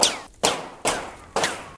a set of ricochets that i separated from the other sounds i have. they work quite well for most types of bullets.
field-recording, fire, gun, impact, report, ricochet, rifle, target